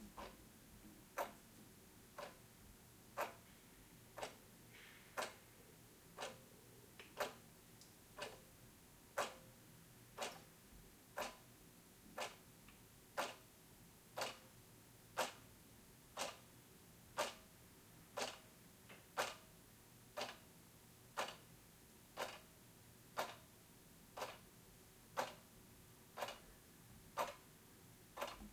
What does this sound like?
Clock Kitchen
Kitchen clock on the wall ticking. Recorded with a Røde Stereo VideoMic Pro (on a Canon DSLR) in my kitchen.
Kitchen, Timepassing, Clock, Seconds, Time, Stereo, Uhr, room